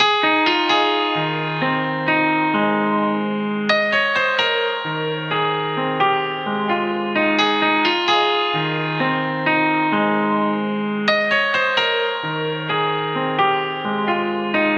Piano Rnb.
Piano which I played Hope will usable for you
Keys, Piano